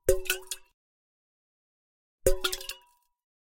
Metal water bottle - hit with finger
Hitting a metal water bottle with my index finger.
Recorded with a RØDE NT3.